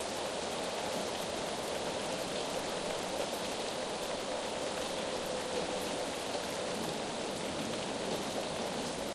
AMBIENT - Rain - Near Highway (LOOP)
Loop: Slight rumble of a City road in the backround, steady rain pouring down on ashphalt. Quite muffled and unclear.
Slight hints of thunder can be heard in this short clip.
Recorded with Zoom H4 Handy Recorder
rain, unclear, weather, field-recording, muffled, nature, sprinkle, rumble, shower, outdoors, short, rainfall